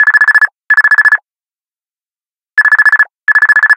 Phone Ringing Sound
cell phone ringing its a sound effect so you can use it in your production
calling, cell, mobile, phone, ring, telephone